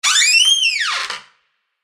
Sound of a door opening. (2)